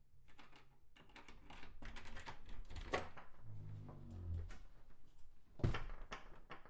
Key Unlocking & Opening Door
door, foley, key, open